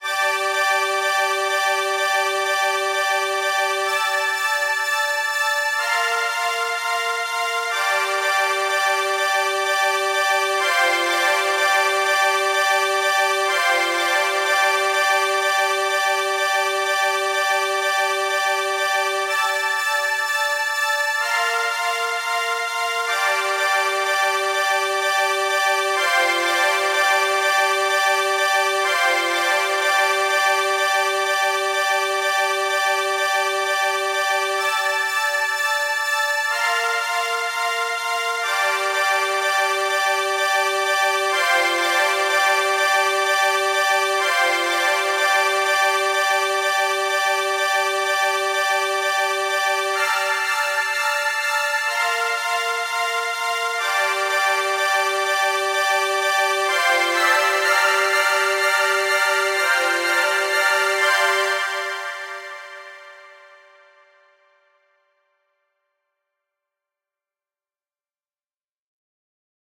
This is a part of the song who i consider is the most important in this mix version. There have 5 parts of the strings and pad, and the conformation if you listen attentionally.